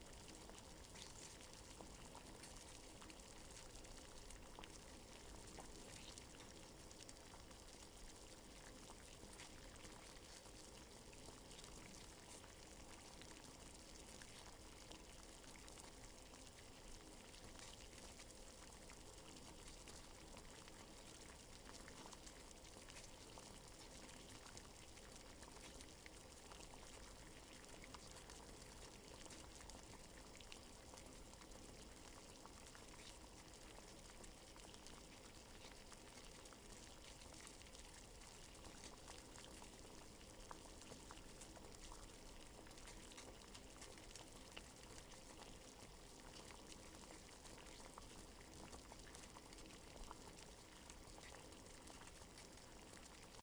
boiled water on stove
gas, water, boiled